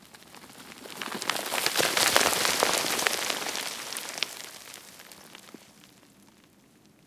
Small stone avalanche caused by dislodging some rocks from an overcrop.
Recorded with a Zoom H2 with 90° dispersion.